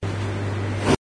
Sound sewing machine in closed room